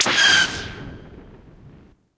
Tie Fighter Shoot (Replication)
This is a replication of the legendary Tie Fighter shot made in VPS Avenger!
weapon, laser, sci-fi, scifi, gun, starwars, shoot